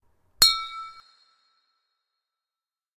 E5note (Glass)
Water glass struck by chopstick. Notes were created by adding and subtracting water. Recorded on Avatone CV-12 into Garageband; compression, EQ and reverb added.
medium-release, clear-note, single-note, Water-glass, strike, fast-attack